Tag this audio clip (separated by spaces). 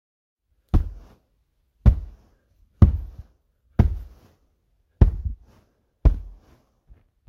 Hitting some impact beating one Hit